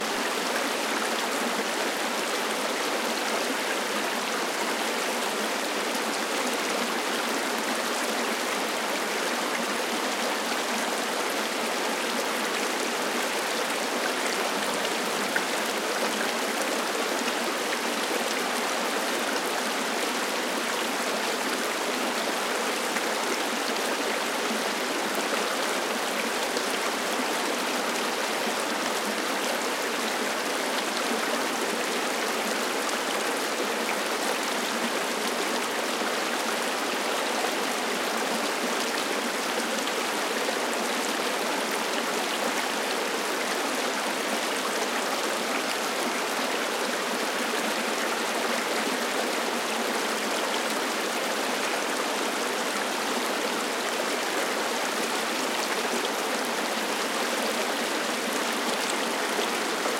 Creek in Glacier Park, Montana, USA
nature, field-recording